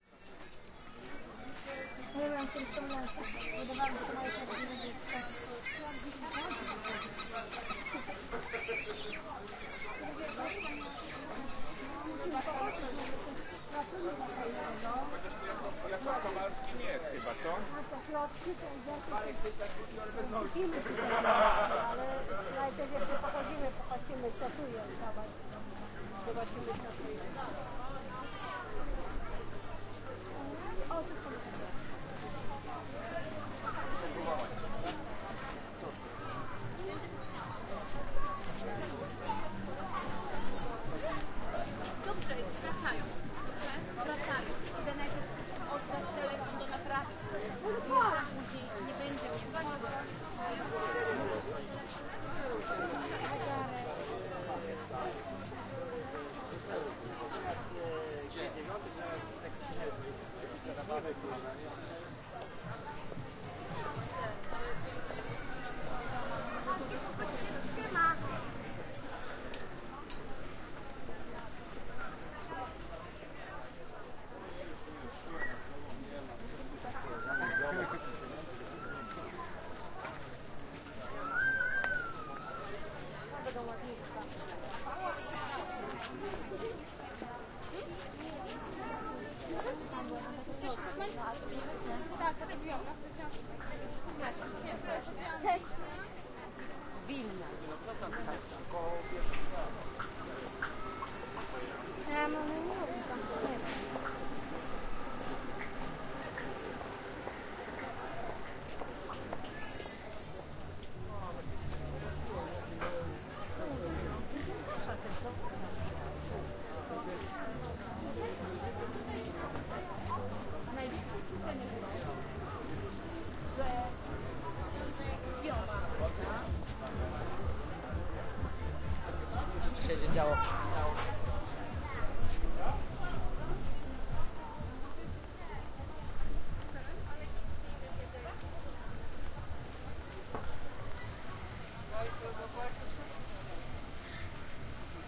fair, stalls, accordion, city, presentation, wine festival, a walk, market, mall, Poland
festival, fair, presentation, city, a, mall, stalls, market